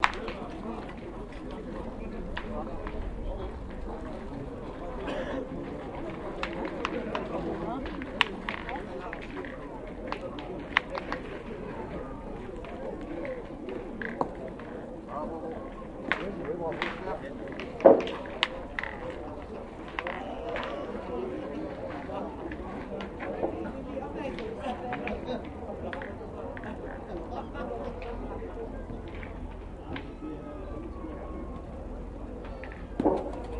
The sound of competitive boules during the French National Championships 2007.
ambience, atmosphere, boules, field-recording, speech